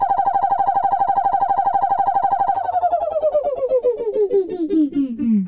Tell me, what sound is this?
AUDACITY
For left channel:
- Cut silence before (0.000s to 0.046s), middle (0.096 to 0.228), and after (0.301 to 0.449) sound
- Cut middle part 0.130 to 0.600
- Effect→Change Speed
Speed Multiplier: 0.800
Percent Change: –20.000
- Effect→Equalization
(18 dB; 20 Hz)
(18 dB; 800 Hz)
(–18 dB; 2000 Hz
(–26 dB; 11 000 Hz)
- Effect→Repeat…
Number of repeats add: 50 (30 for hover, 20 for stop hover)
Select repeats 30 to 50 (2.547s - 4.190s)
- Effect→Sliding Time Scale/Pitch Shift
Initial Temp Change: 0%
Final Tempo Change: –50%
Initial Pitch Shift: 0%
Final Pitch Shift: –50%
- Effect→Sliding Time Scale/Pitch Shift
Initial Temp Change: 0%
Final Tempo Change: –50%
Initial Pitch Shift: 0%
Final Pitch Shift: –50%
For right channel:
- Tracks→Add New→Mono Track
- Copy left track and paste at 0.010 s